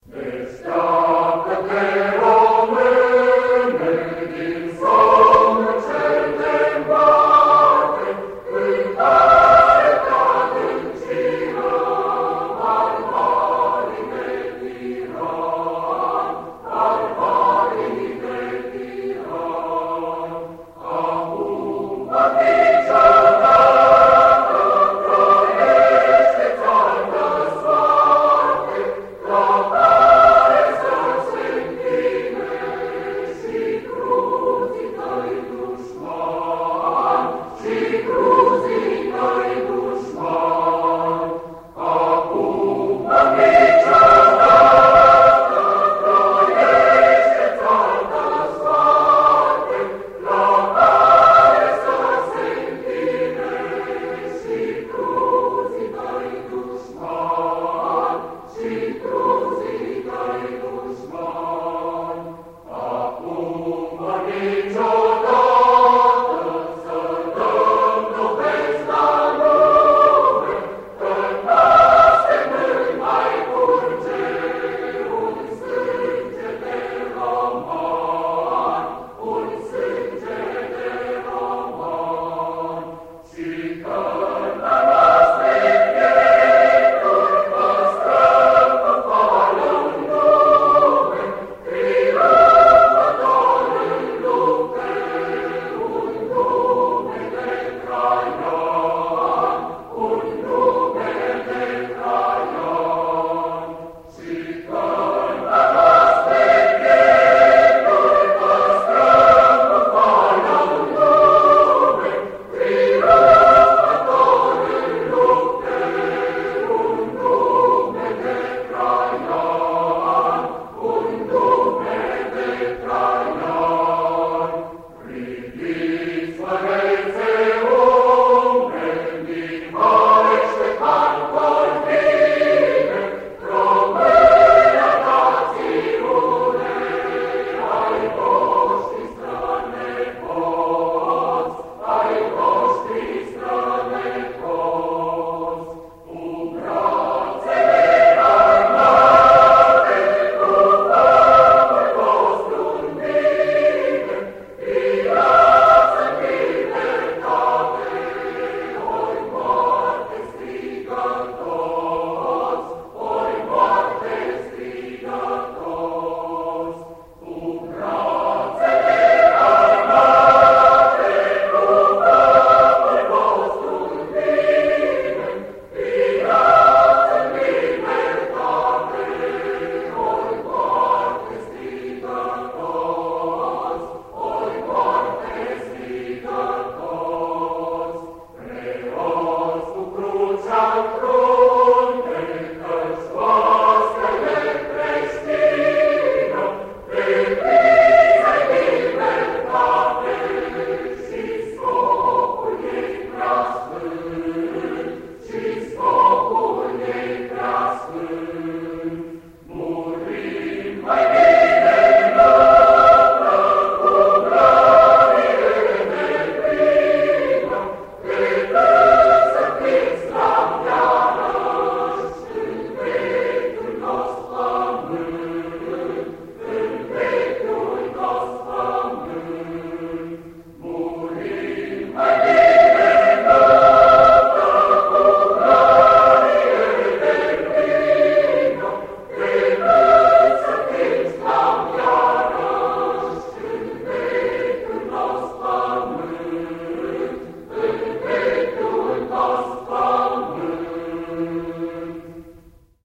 National anthem of my country, Romania. Sung by mixed choir, I guess.
for more info.